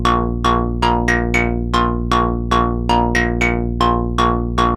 Some recordings using my modular synth (with Mungo W0 in the core)
Analog, Synth